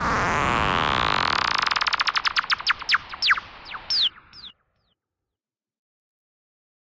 Trap Digital Shot 10 Too many beans
Funny Dub Siren.
Weird computer effect.